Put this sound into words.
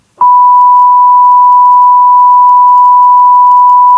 Four seconds of 1KHz.
You've probably heard it before in a TV test pattern or to censor out swear words.
Thanks, and have fun with this sound!
1khz, beep, censor, sine, sinewave, swearing, test, test-pattern, tone, wave